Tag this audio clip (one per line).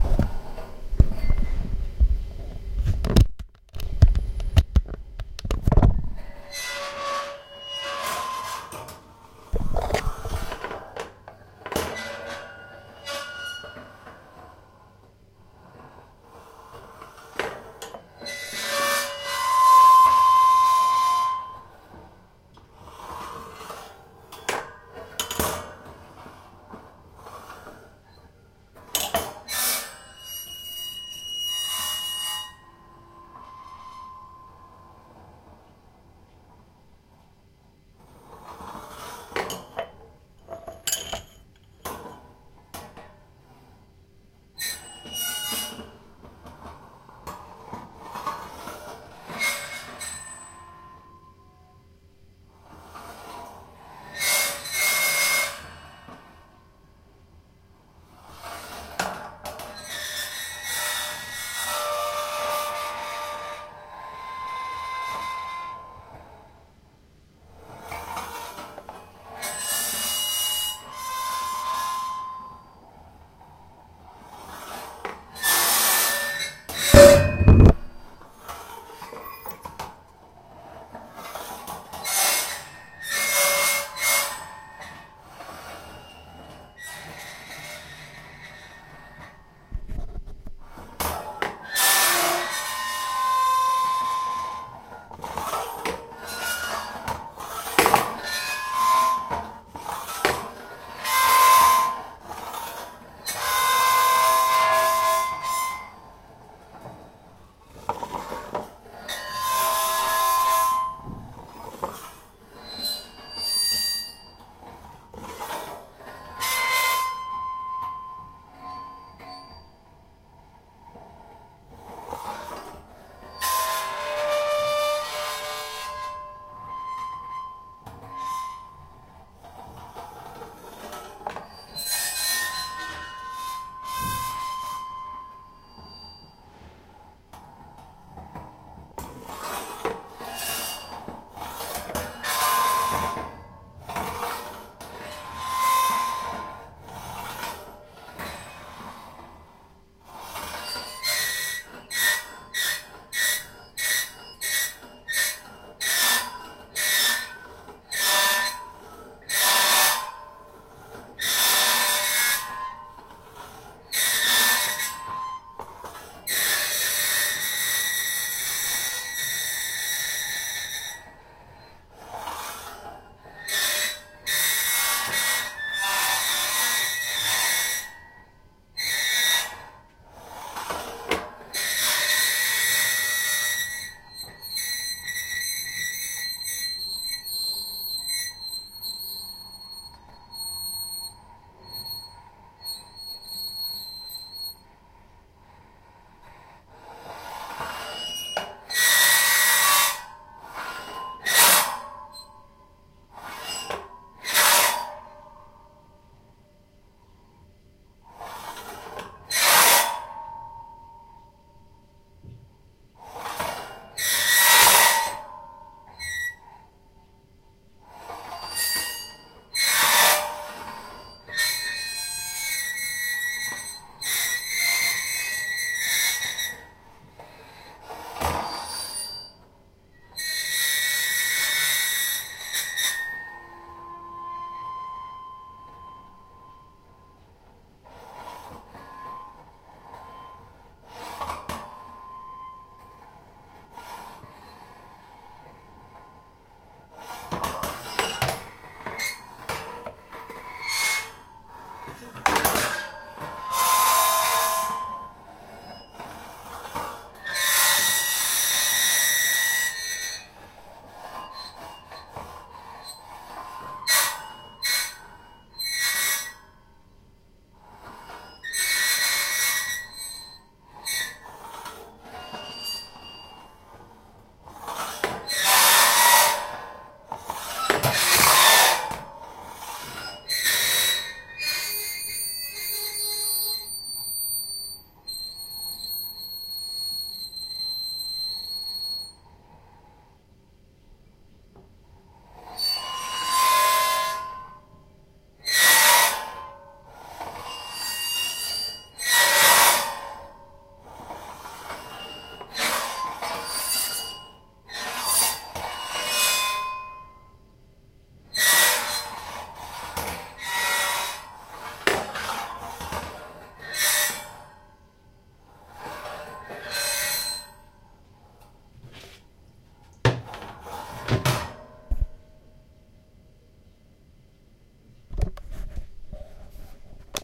industrial
iron
metal
rubbed